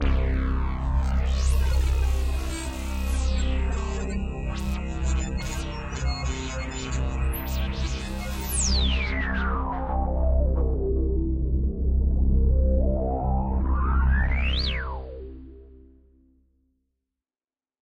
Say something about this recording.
8 measure pedal on C using Korg Wavestation and .com modular